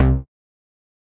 Synth Bass 015
A collection of Samples, sampled from the Nord Lead.
bass, nord, synth, lead